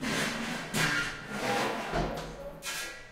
open and close metal assembled 19" drawer
19-metal, drawer